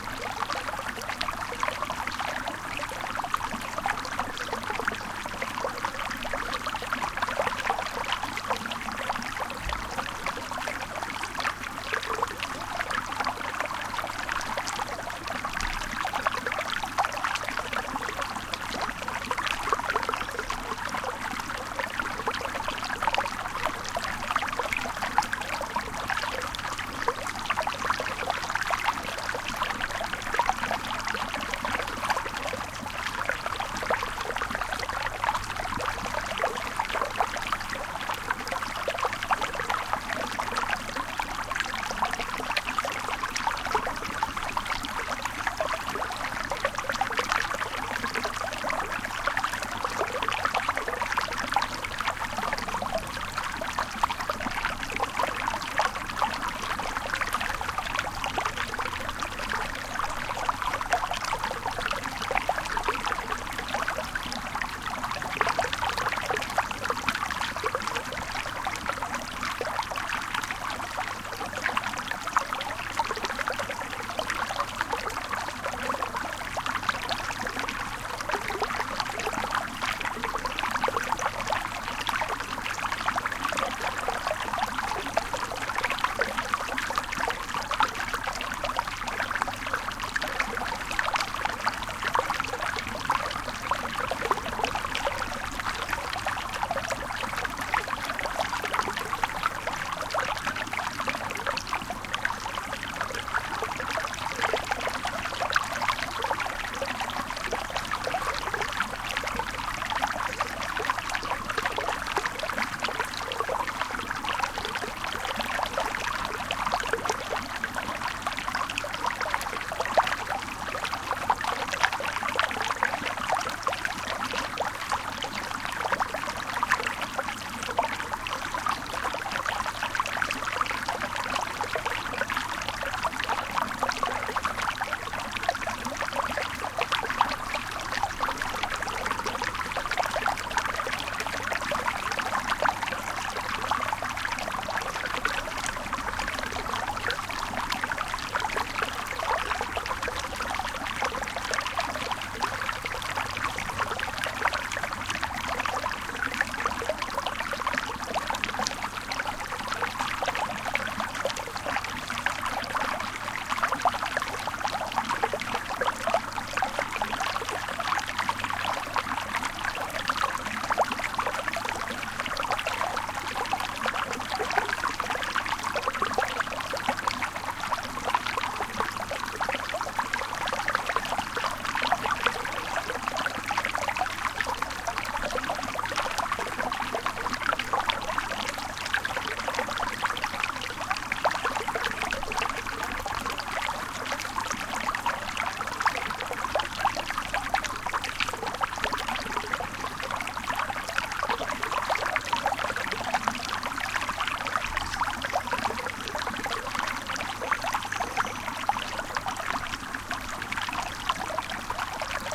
babling from a small water stream. Recorded at the tiny village of Peñalba de Santiago ( León province, NW Spain) using two Primo EM172 capsules, FEL Microphone Amplifier BMA2, PCM-M10 recorder